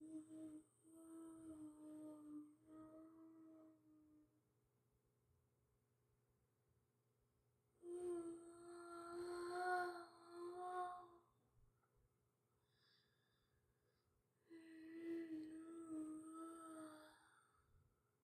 ghostly moans
creepy, ghost, ghostly, haunted, haunting, horror, moan, paranormal, phantom, sinister, spooky